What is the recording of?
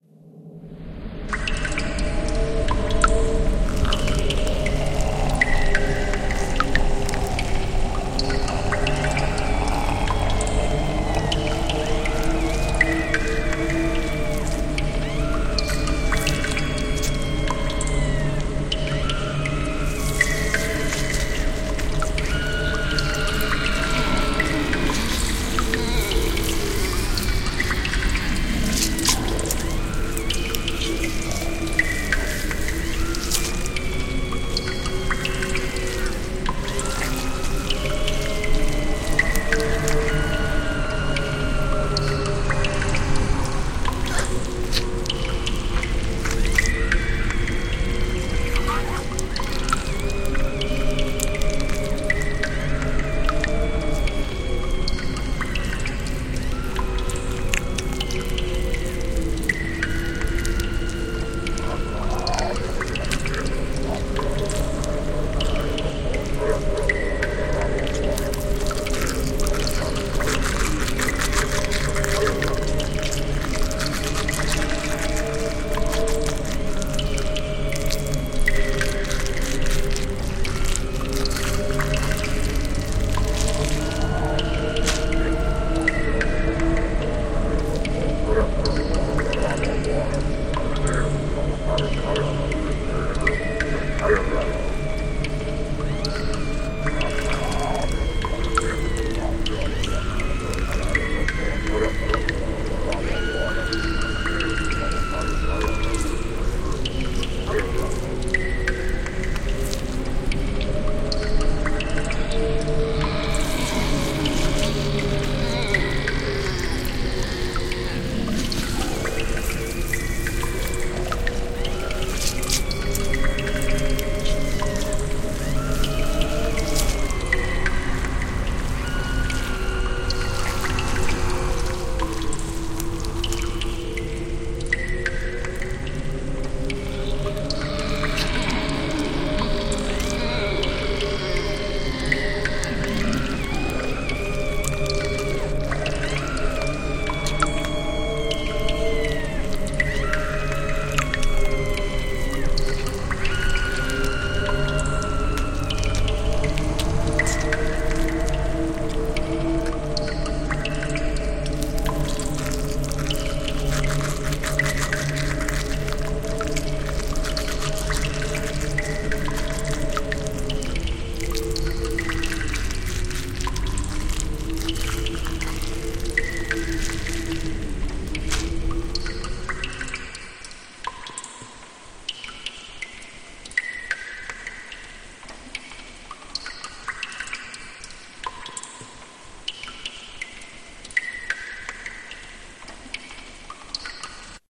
An old sound I created for the haunted house I am the audio design guy for, a walk thru a dark creepy cave with lots of eery sounds happening all throughout.
We dont use this audio piece anymore so ENJOY! I use Adobe Audition 3
ambient, dripping, cave, creepy, horror